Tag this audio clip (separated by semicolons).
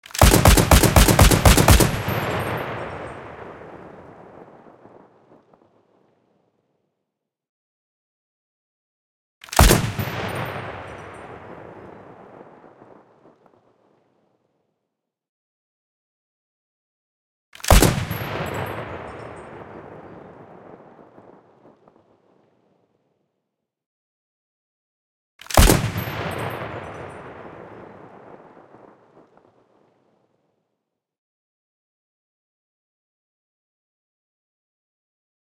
shot; shooting; warfare; war; soldier; army; big; gun; rifle; projectile; shoot; heavy; weapon; firing; military; attack; fire